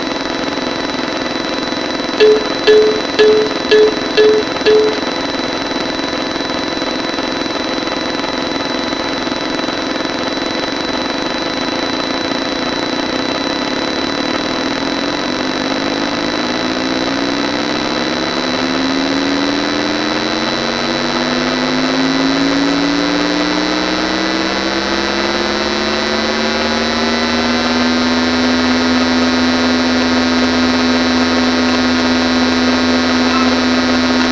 Small lumps of sounds that can be used for composing...anything